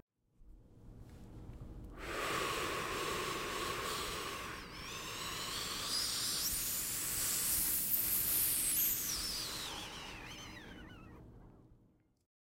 Inhale with Reverb
I inhale and use reverb to make a wind sound.